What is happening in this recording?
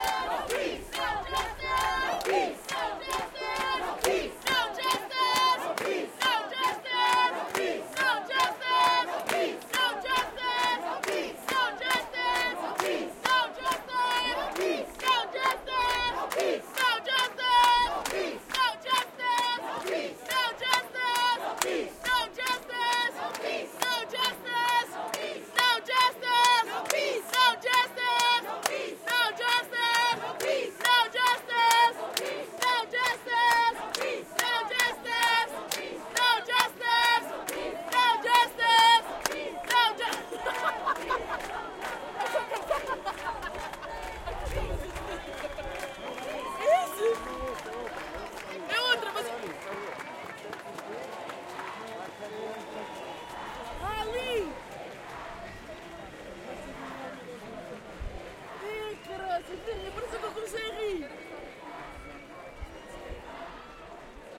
BLM 2 Protest Lisbon Portugal June 2020
Sounds from the Black Lives Matter protest in Lisbon June 2020.
Blacklivesmatter, Lisbon, Protest